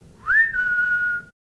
Whistle SoundSmith
male, whistle